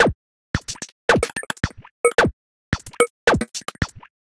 freaky beat mix
Freaky little electronic beat.